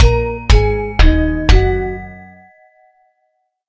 This can be used as a game "easter egg" or secret so people who play your game can find this sound playing in somewhere
and other things
Made in Minecraft Note Block Studio